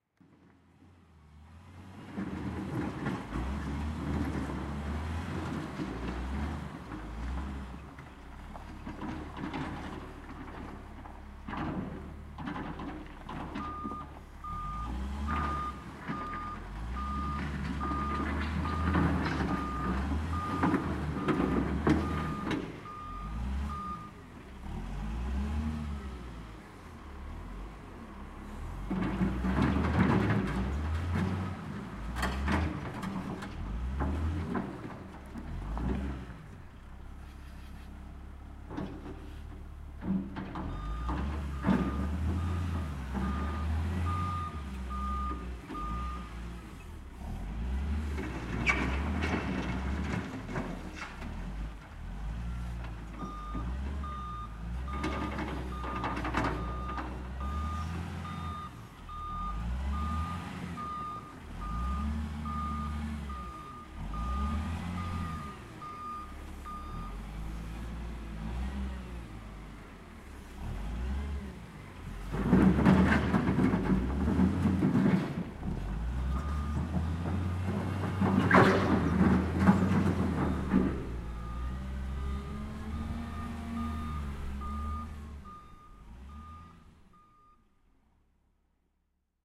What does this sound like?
Volvo wheel loader
A wheel loader in action
at a construction site.
Zoom h4n Pro
Wave lab
ambience, background-sound, engine, excavator, field-recording, h4n, loader, motor, pro, volvo, wheel, wheeled-loader, wheel-loader, Zoom